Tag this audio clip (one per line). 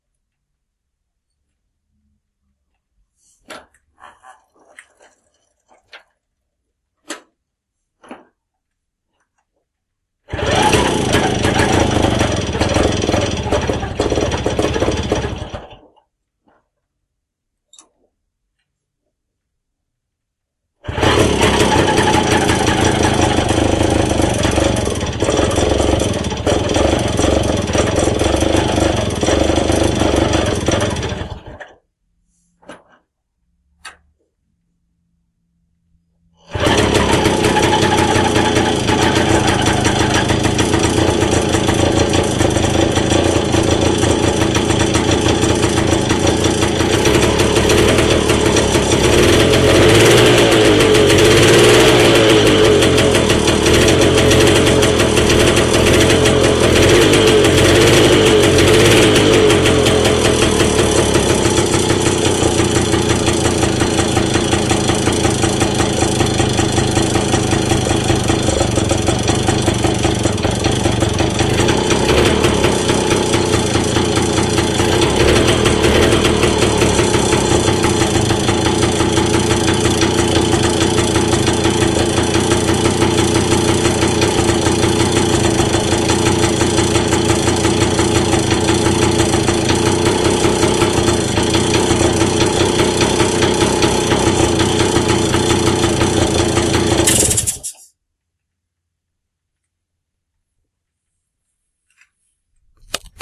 motorbikeVintage-light
motorbike-start